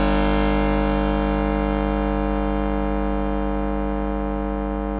Superness 5,13 imag
Real axis from organ-like sound from Superness object with nine spikes, a = b = 0.5 with three overtones
harmonics, organ, additive, synthesis, superellipse, superformula